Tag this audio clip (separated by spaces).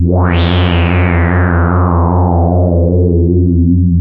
horror multisample subtractive evil synthesis